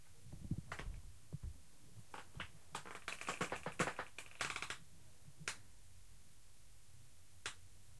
its just a recording of myself siting on a plastic chair